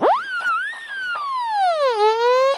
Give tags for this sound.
flute; sequence

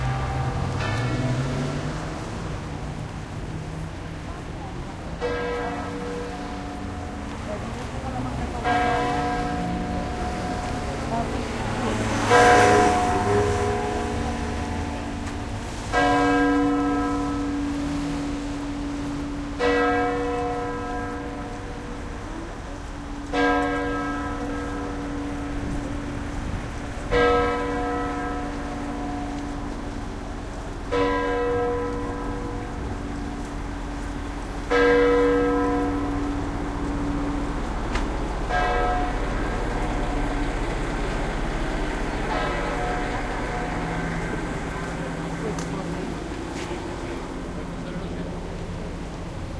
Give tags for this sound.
ambiance; field-recording; church-bell; voice; street; streetnoise; city

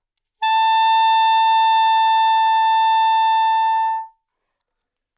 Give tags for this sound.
multisample; good-sounds; sax; alto; neumann-U87; single-note

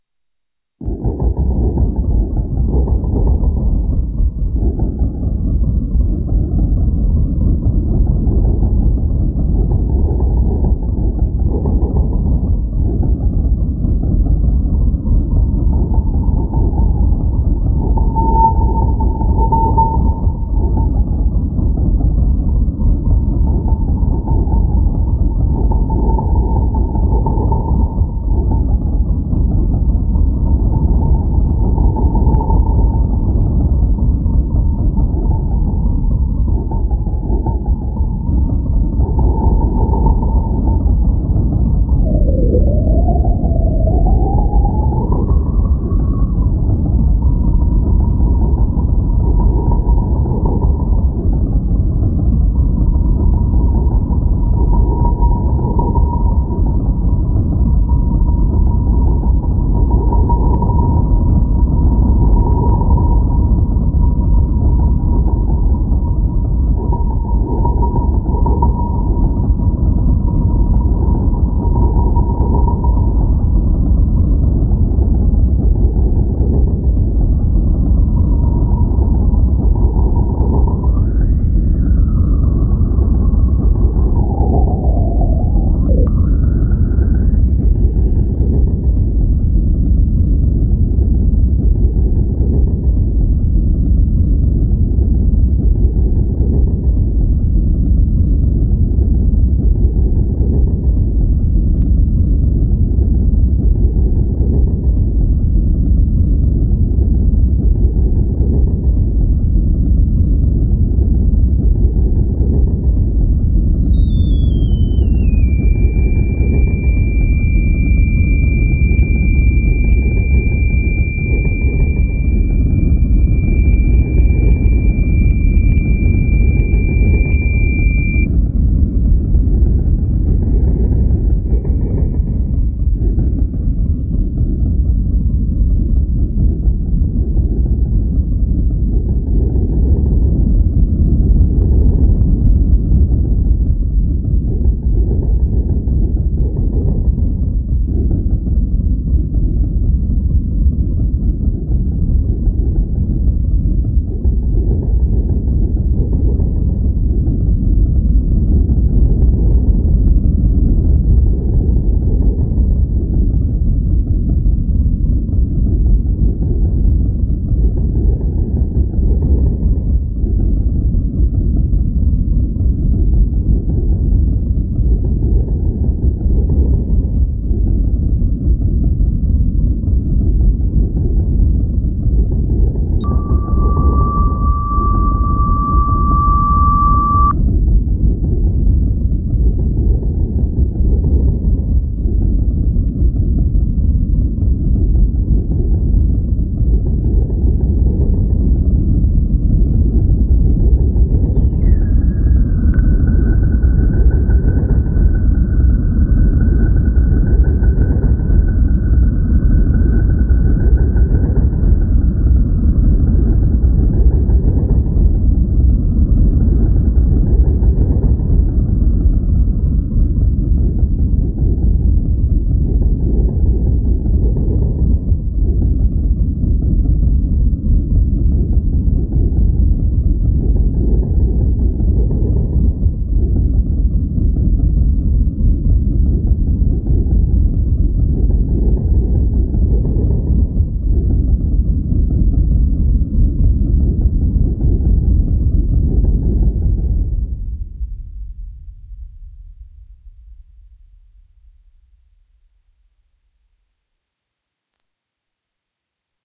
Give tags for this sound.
deep; black; spooky; sinister; creepy; sub; dark

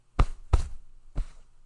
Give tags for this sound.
cloth; pet

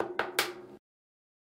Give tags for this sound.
Drum-Roll; Foley-Sounds; Trash-Can; Zoom-H4

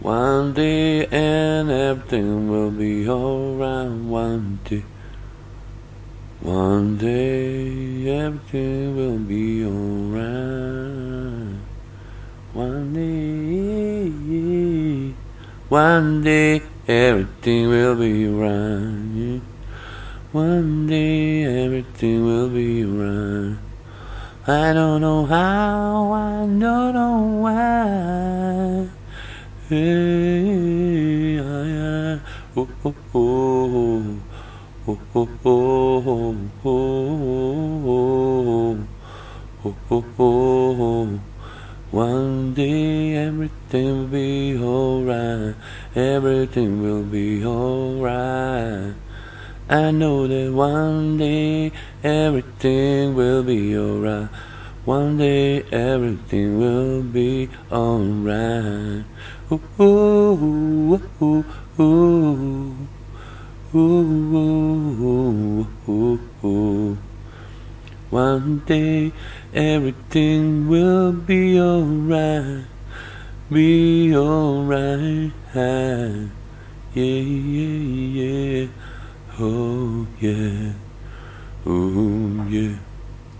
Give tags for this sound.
male; sing; singing; voice

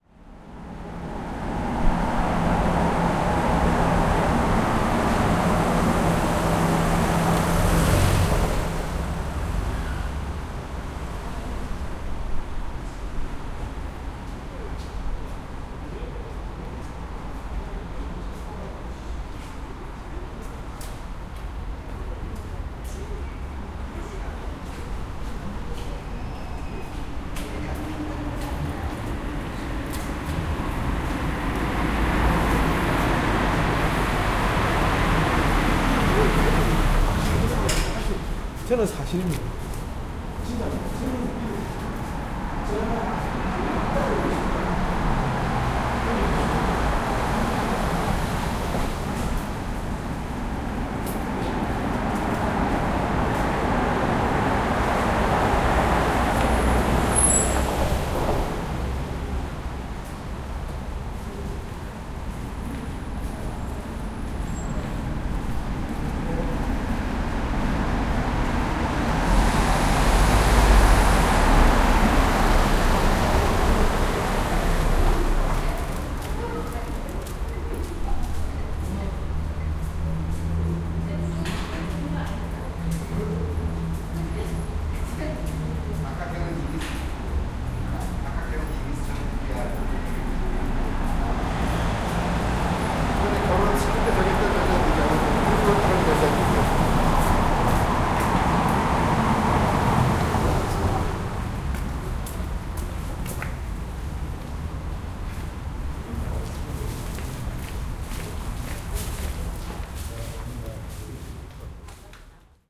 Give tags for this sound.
korean; seoul; footsteps; korea; cars; voice; field-recording

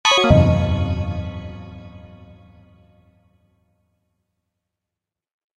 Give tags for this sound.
bootup; click; effect; desktop; startup; clicks; bleep; sound; intros; intro; sfx; game; application; event; blip

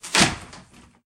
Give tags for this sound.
hit; smack; wood

